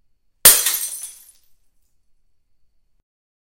This is a studio recording of a glass bottle being broken on the floor. Three mics were used to capture the sound. It was recorded with Sonar by Cakewalk.